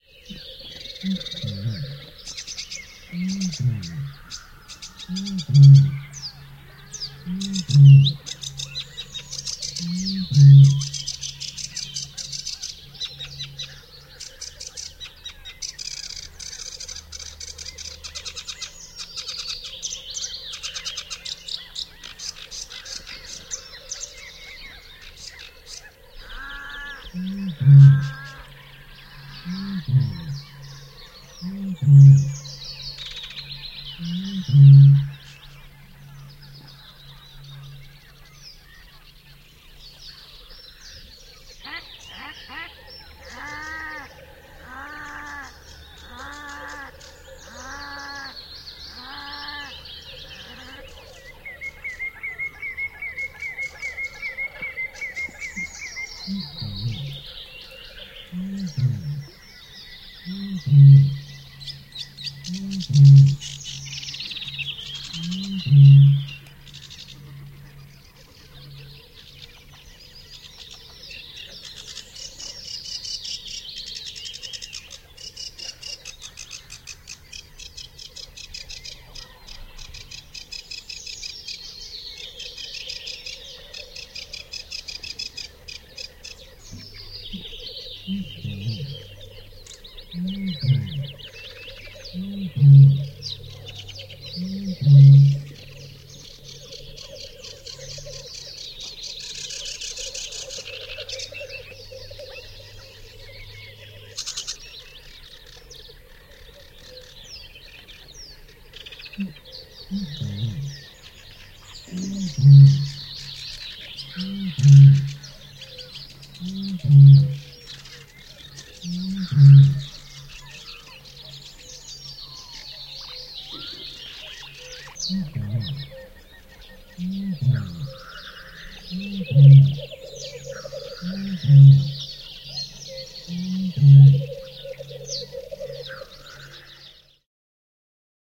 Kaulushaikara, lintuja ruovikkoisella lahdella, kevät / Bittern bellows in a canebrake on a lake in the spring, lots of other birds having a display
Kaulushaikaran matalia mylväisyjä ruovikkoisella järvellä, kuin pulloon puhallettaisiin. Kevät, järvi, runsaasti muita lintuja soitimella.
Paikka/Place: Suomi / Finland / Kitee, Puruvesi
Aika/Date: 16.05.2002
Soundfx, Field-Recording, Lintu, Yleisradio, Soidin, Bittern, Linnut, Display, Bird, Birds, Finland, Tehosteet, Luonto, Suomi, Nature, Yle, Spring, Finnish-Broadcasting-Company